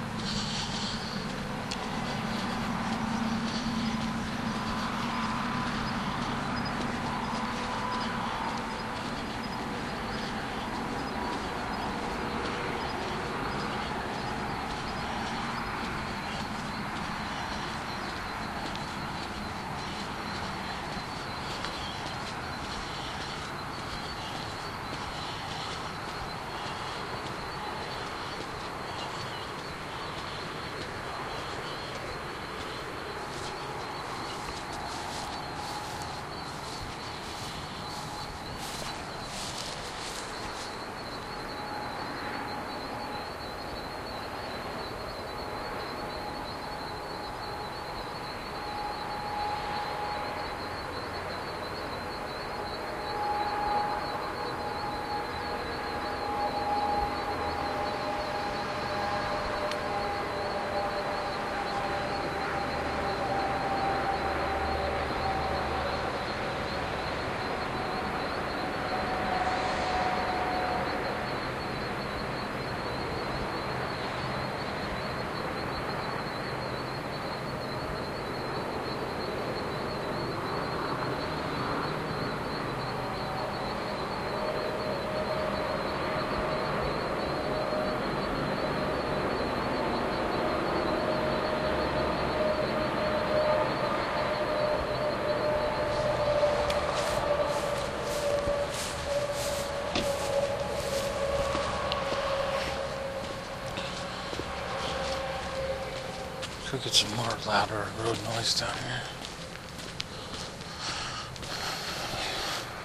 walkingcritter roadnoise
ambient, field-recording, road, walking